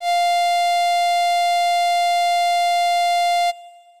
FM Strings F5
An analog-esque strings ensemble sound. This is the note F of octave 5. (Created with AudioSauna, as always.)